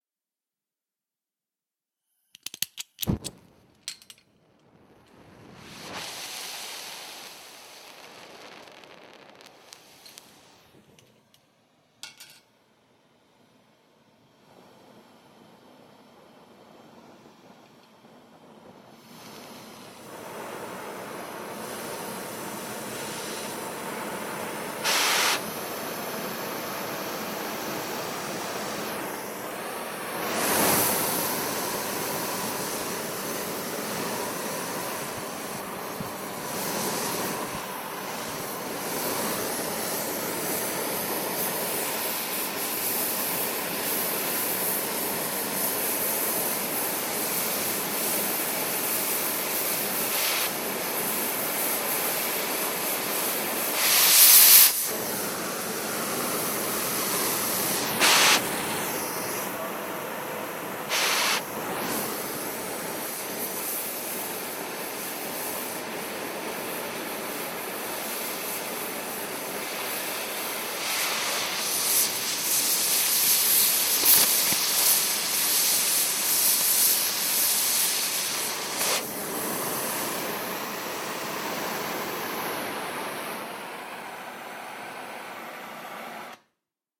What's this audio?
Full work cycle of a torch being lit and used to cut through a steel sheet.